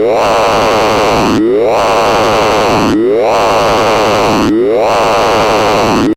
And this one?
quantum radio snap005
Experimental QM synthesis resulting sound.
drone, experimental, sci-fi